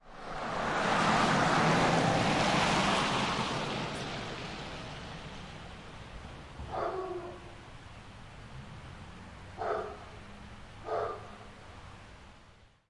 Rain Road Car and Dog
It's night, it's raining, it's pleasant 20 degrees C and I am standing on my front veranda with an R-09 connected to a Rode NT4. I am trying to record the rain-drops on the veranda roof (it's tin). A car drives past so I quickly point the mic at the street. You can hear the tyres on the wet road...not long after the car passes a neighbors dog decides the car sucks. WOOF!
rain, recording, car, dog